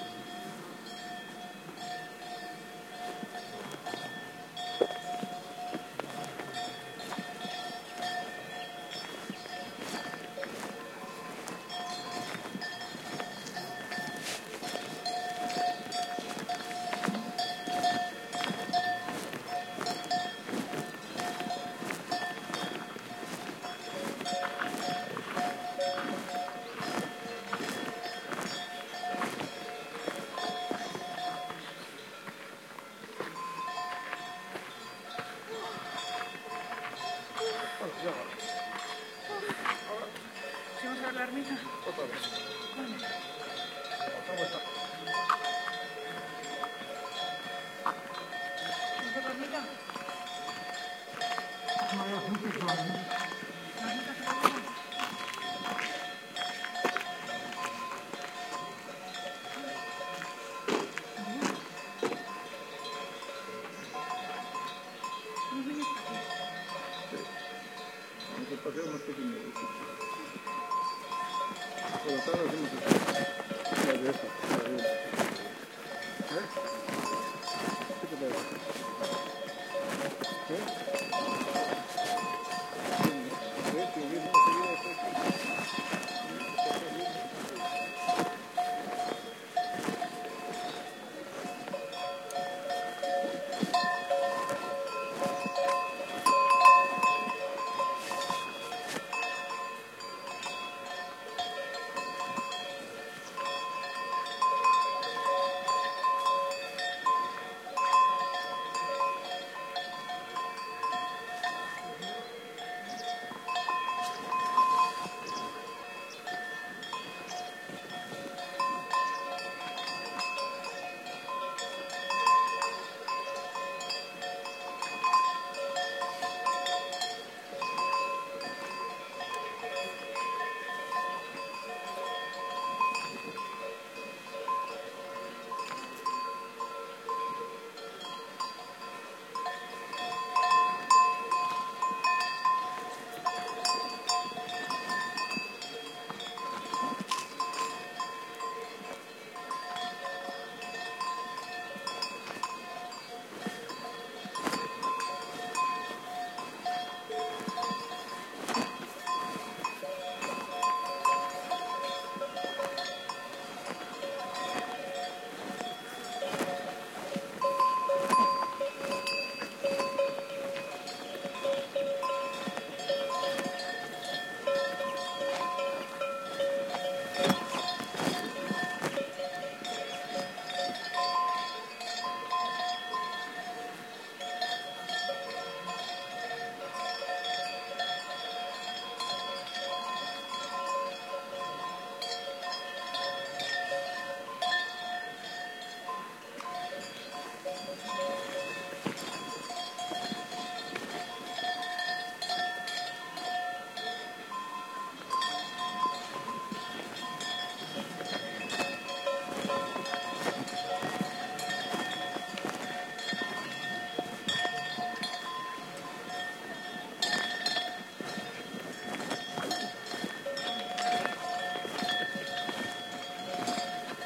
20110804 grazing.cows.11
cow grazing + bells in a mountain area, some voices in background. Recorded near Ermita de la Virgen de la Loma de Orio (Villoslada de Cameros, Spain). Shure WL183, Fel preamp, PCM M10 recorder.